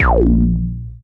Analog Drum Kit made with a DSI evolver.
Analog, Drum, Kit, Synth